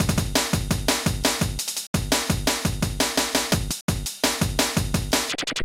Glitch looped drum pattern made by digital tracker.
Please check up my commercial portfolio.
Your visits and listens will cheer me up!
Thank you.